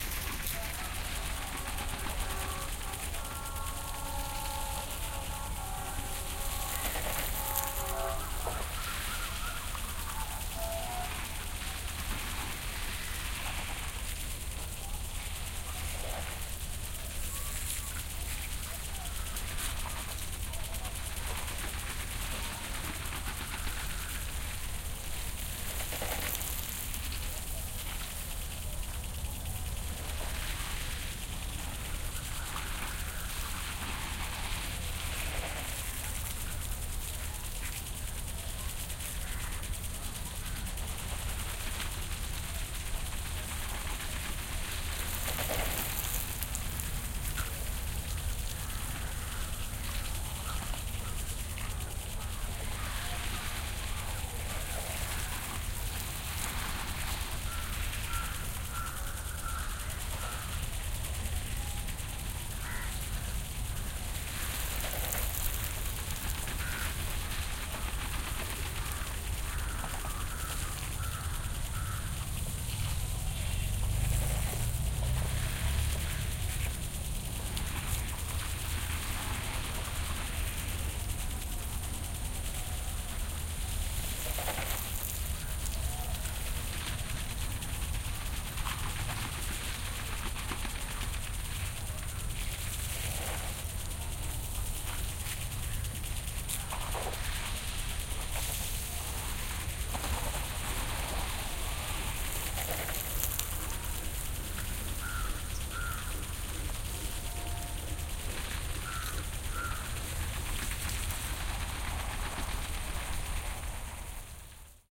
bangalore wassersprenger
Recorded in Bandappa Garden, Jalhalli, Bangalore, India.
I am sitting on a bench and around me the sprinklers watering the palmtrees and various plants.
sunday, garden